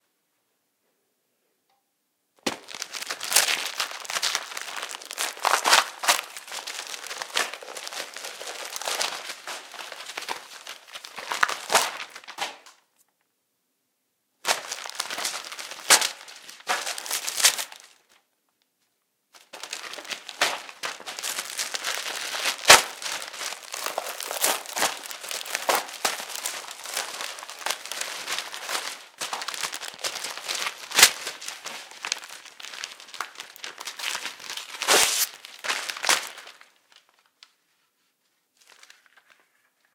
Book Torn Apart
The sound of a pocket-sized book (or perhaps a newspaper or magazine) being torn apart.
newspaper, tear